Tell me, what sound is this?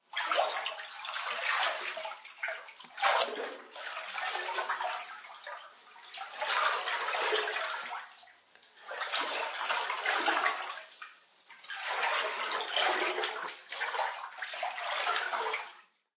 water effect, might help as background